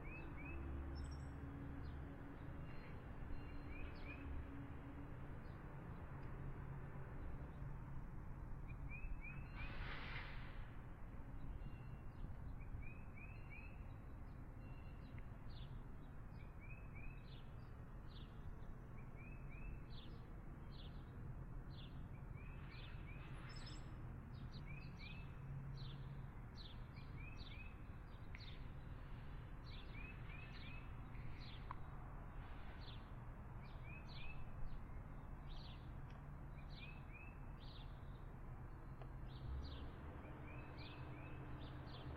Outside Ambience with Birds and Cars
ambient, outside, city